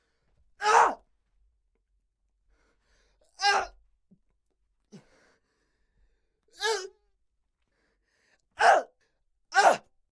Male 20 yo was shot on back / sword stroke / grenade explode on foot